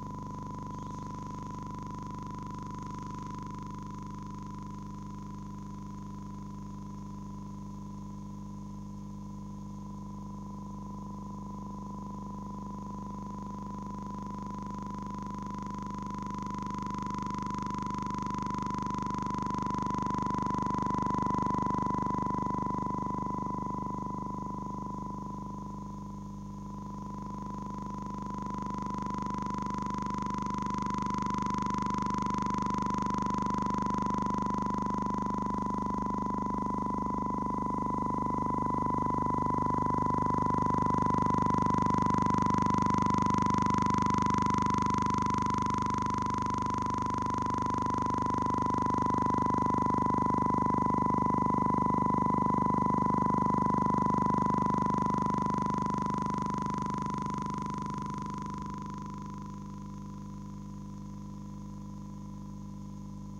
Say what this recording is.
This signal was not from Space. No, it came from our planet and seemed to be directed by a extremely powerful transmitter. It seemed as the beam was directed at the Cyclades.The sender position was somewhere on the Yucatan peninsula, but the exact place was never found.